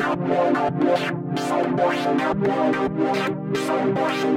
vintage synth 01-01-03 110 bpm
some loop with a vintage synth
synth, vintage, loop